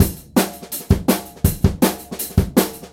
Drumloop DnB 1
A drum n bass / jungle / breakbeat drumloop I recorded here in my attic.
Recorded with Presonus Firebox & Samson C01.
kick, loop, groove, break, bass, rhythm, drumloop, hihat, groovy, breakbeat, beat, drum, snare, jungle, dnb, amen, breaks